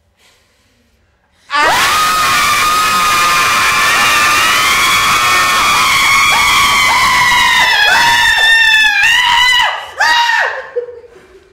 This is part of series of recorded material for LaFormela Fashion Show Intro, recorded by Zoom h6 a rode ntg3.
group, hard-core, know, laughing, man, people, score, scream, screaming, shout, smile, women